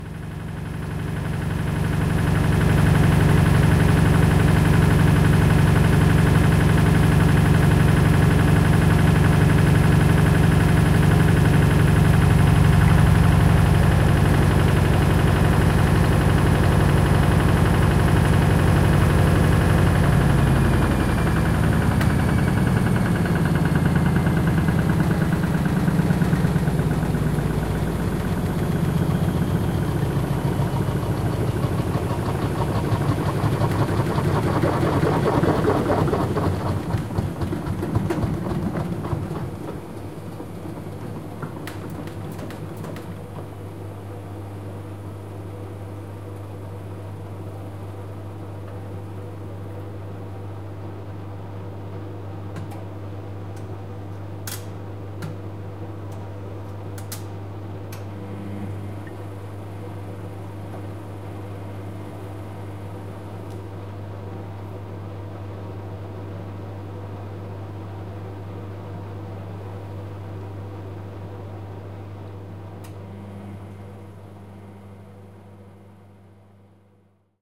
Washing mashine, centrifugue.
mashine, Washing, centrifugue